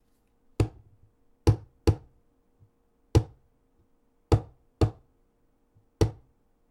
A few simple thumps recorded by hitting the claw side of a hammer on a composite desk.